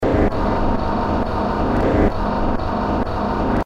sound-design created by heavily processing a field-recording of water I recorded here in Halifax; processed with Adobe Audition
2-bar, ambient, dark, electronic, field-recording, industrial, loop, pad, processed, rhythmic, sound-design